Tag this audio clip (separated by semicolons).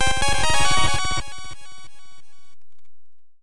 notification; video-game; game; complete; mission; rpg; levelup; adventure; battle; goal; success; level; roleplay; fanfare; up